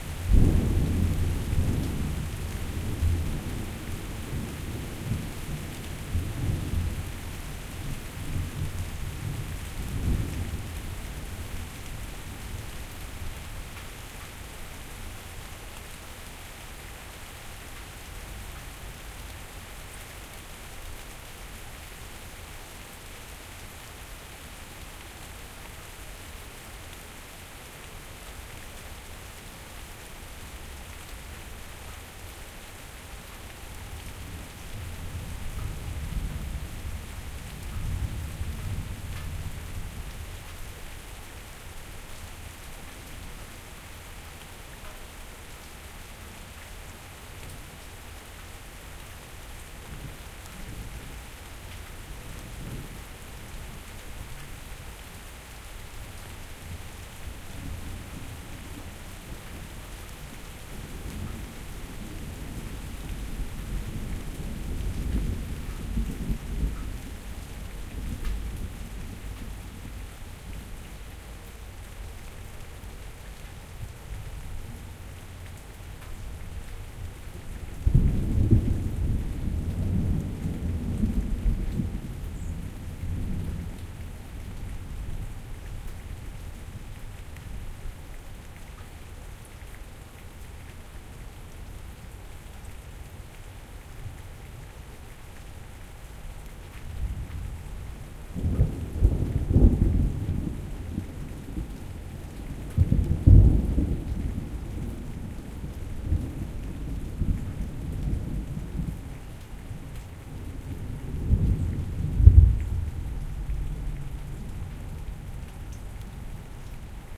rain distant thunder porch interior 2
light-rain, interior, rain, porch, trickle, distant-thunder, storm